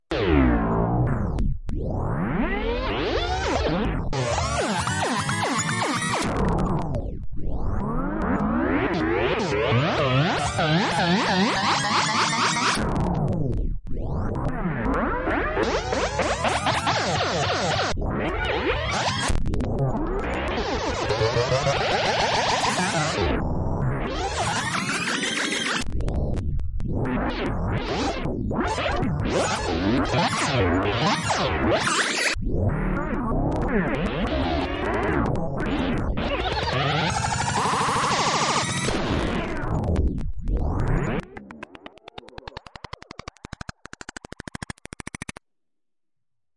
Abstract Glitch Effects 016
Abstract Glitch Effects / Made with Audacity and FL Studio 11
Random, Sci-fi, Electric, Sound, Sound-Design, Abstract, Weird, Design, Effects